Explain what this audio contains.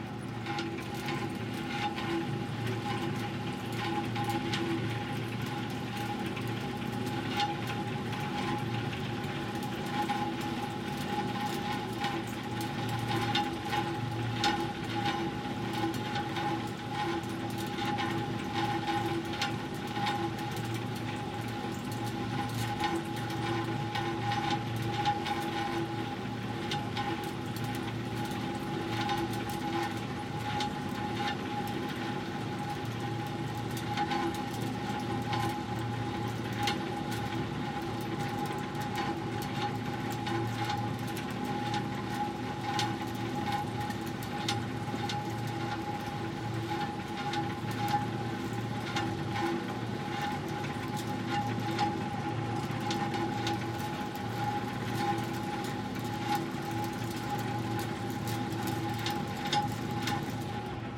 1 minute close-up recording of the pulling steel cable of the San Francisco cablecars.
Good for sound design.
Recorded on a Sound Devices 663, Sennheiser 8060 microphone.